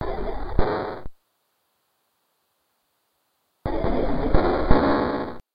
Pacifier 0bject count4
Amore! A more strange strangling HIT LOOP!